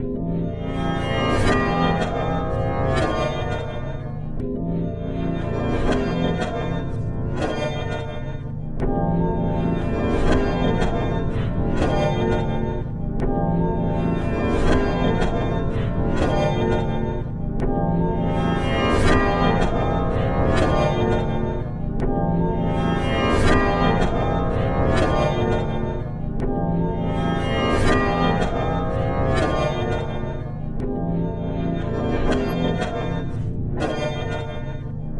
A sad man walks in rain knowing not where he goes. Not caring. He thinks about woman.
I make from cupboars sound recordings from same as first one.
Lots of diffrent too. Not same, no way!
Use old microphone and Apple computer that works not well sometimes.